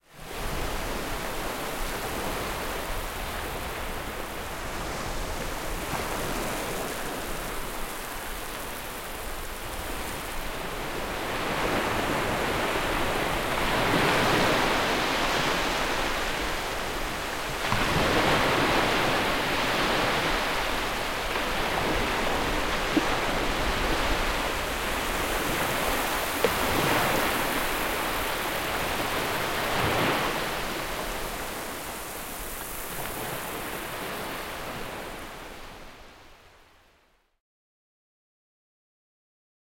Ocean gentle waves on beach fizzing bubbles
Calm waves crashing on a beach with the sound of fizzing bubbles as they break to an end. Recorded in Walton-on-the-Naze, Essex, UK. Recorded with a Zoom H6 MSH-6 stereo mic on a calm spring morning.
Beach, bubbles, calm, Essex, gentle-waves, North-sea, Ocean, Ocean-ambient, Ocean-waves, sea-bubbles, spring, Wave-bubbles, Waves, Zoom-h6-msh-6, zoomh6msh6